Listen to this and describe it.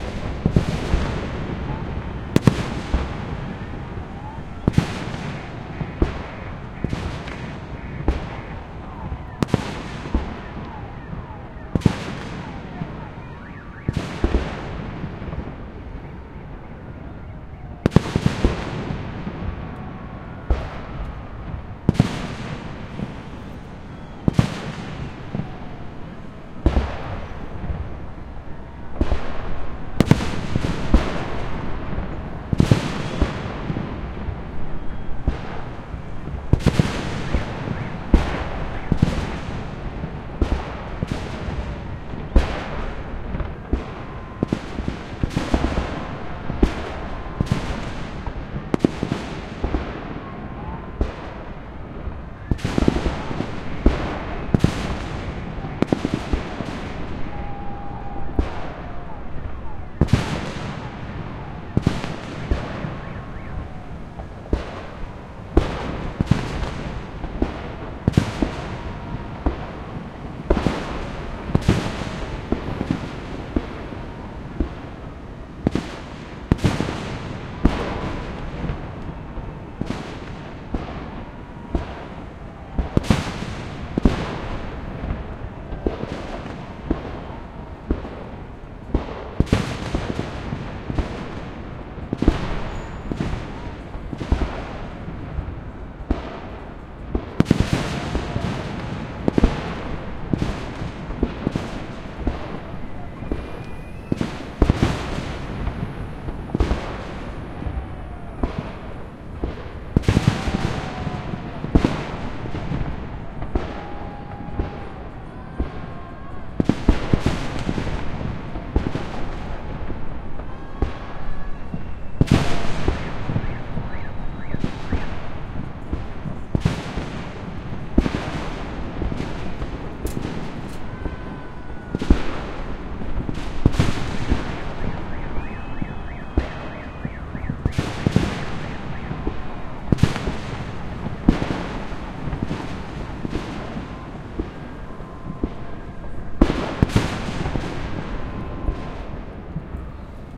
09052019 victory day fireworks crowd car alarms
Victory Day fireworks display at night in Moscow, Russia, recorded on Sony PCM D-100 in ORTF stereo. Hi Resolution recording
city, pcm, cars, recording, alarm, celebration, shouting, explosion, holiday, victory, night, fire, shouts, hi-res, sony, car-alarms, alarms, urban, people, field-recording, fireworks